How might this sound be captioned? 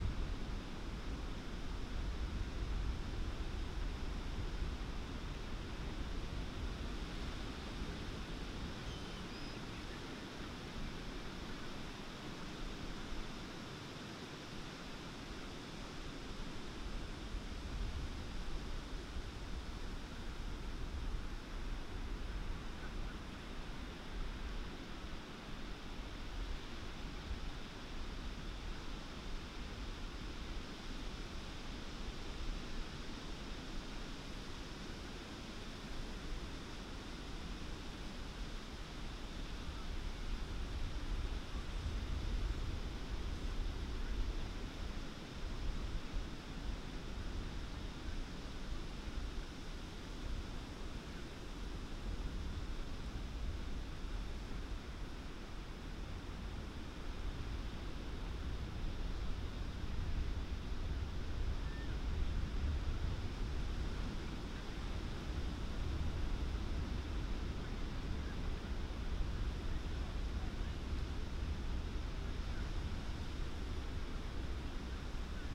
Room Tone of a Beach
Some general noise of a beach in southern California. Good for some background noise to ground a scene.
Recorded using a RODE VideoMic Go into a Zoom H1 recorder.
beach, california, coast, ocean, room, room-tone, sea, seaside, shore, water, waves